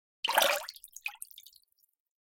Water pouring 6
pouring, splash, Water, water-drops